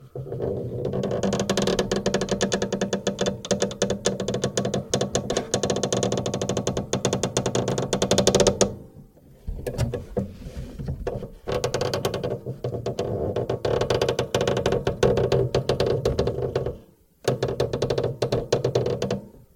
Radio case resonant switch slow moves squeaks
Radio case resonant squeaks, switch slow moves, similar to squeaky door open. Recorded in mono with Rode NTG-3 and Tascam DR-60d.
resonant, foley, move, switch, open, click, squeak, door, case, radio, close